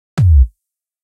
kick made with zynaddsubfx
zynaddsubfx, synth, bass, bd